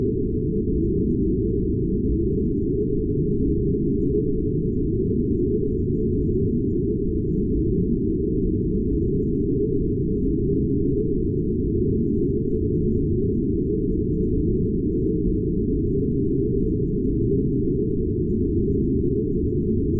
Longer sequences made with image synth using fractals, graphs and other manipulated images. File name usually describes the sound...